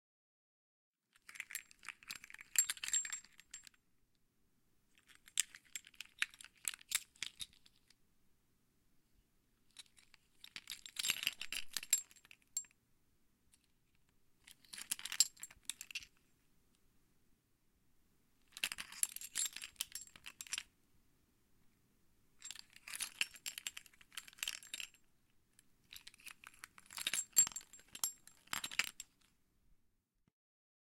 Car keys being fiddled with.